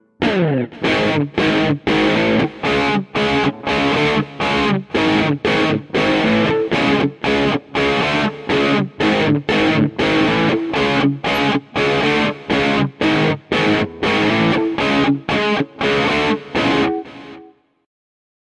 A flat to riff
Electric guitar, mid gain, standard style of rock riff, good for a beginning, break or outro.